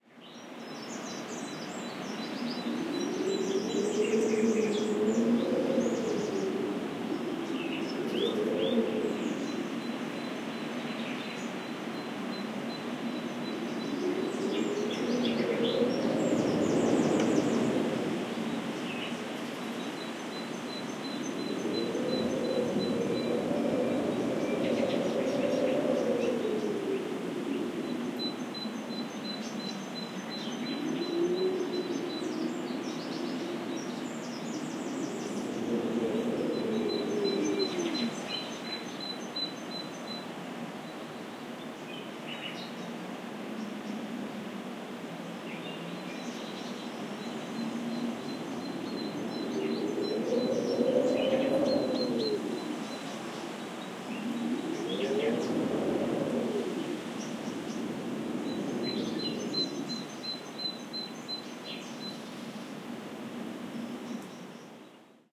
20160416 howling.wind.02
Weird mix: wind howls ominously at door, cheery bird tweets outside. Audiotechnica BP4025, Shure FP24 preamp, PCM-M10 recorder. Recorded near La Macera (Valencia de Alcantara, Caceres, Spain)
ambiance, cheerful, contrast, dark, dreary, field-recording, gusty, howling, nature, sinister, storm, wind